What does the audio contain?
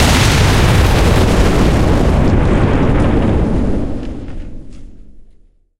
Some explosion sounds I mixed up from various free web sounds i.a.